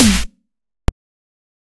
Dubstep Snare 5
A lower pitched snare from combining a lower tom and a white noise snare.
adriak, dnb, drum-and-bass, Dubstep, FL-Studio, glitch, hard, heavy, hip, hop, pitched, processed, punchy, skrillex, snare